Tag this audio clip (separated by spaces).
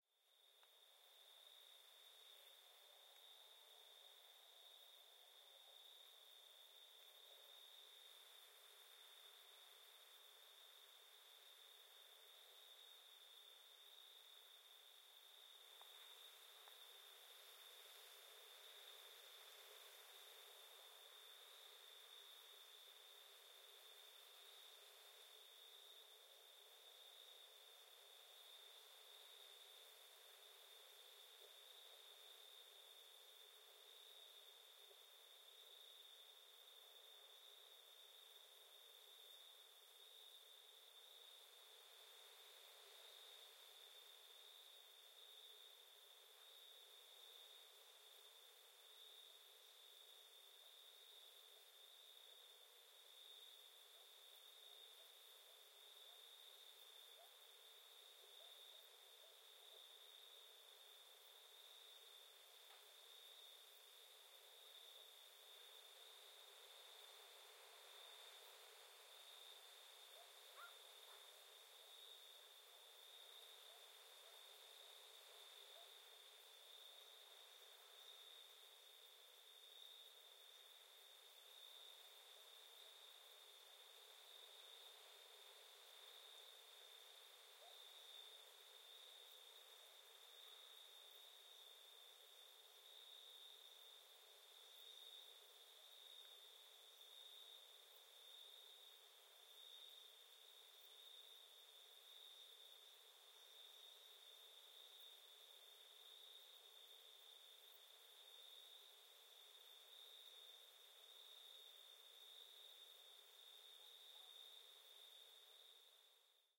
binaural cicadas dogs field-recording insects italy nature OKM summer weather